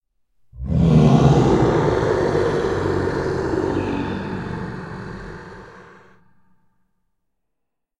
Evil creature from within, rate decrease released the beast! "Au de bigdown" tool's is name. Scourge the eardrums of who enters this cave!
Recorded with Zoom H2. Edited with Audacity.